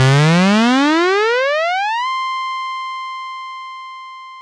Regular Game Sounds 1
You may use these sounds freely if
you think they're usefull.
I made them in Nanostudio with the Eden's synths
mostly one instrument (the Eden) multiple notes some effect
(hall i believe) sometimes and here and then multi
intstruments.
(they are very easy to make in nanostudio (=Freeware!))
I edited the mixdown afterwards with oceanaudio,
used a normalise effect for maximum DB.
If you want to use them for any production or whatever
20-02-2014
effect, game, sound